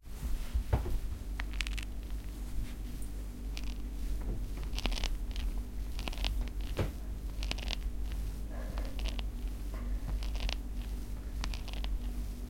Recording of a crackling knee made with a Zoom H1 from 15cm away.
There was a fridge nearby so there is some noise/humm in the recording. Sorry about that.
bones, crackling, joints, knee